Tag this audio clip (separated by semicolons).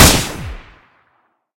shot; boom; Gun